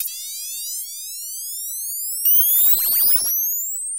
high frequencies G#3
This sample is part of the "K5005 multisample 20 high frequencies"
sample pack. It is a multisample to import into your favorite sampler.
It is a very experimental sound with mainly high frequencies, very
weird. In the sample pack there are 16 samples evenly spread across 5
octaves (C1 till C6). The note in the sample name (C, E or G#) does
indicate the pitch of the sound. The sound was created with the K5005
ensemble from the user library of Reaktor. After that normalizing and fades were applied within Cubase SX.
weird, experimental, reaktor, multisample